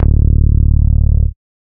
One of my early Psy Goa trance base sample packs. I think it starts at C2. I have never seen a set of Psy base samples on the net, thought I'd put them up. if anyone has a set of sampled bass for Psy / Goa available, please tell me, I'm still learning, so these are surly not as good quality as they could be! Have fun exploring inner space!